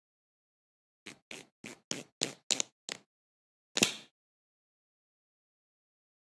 23. Disparo flecha
disparo fleca foley
arms, shoot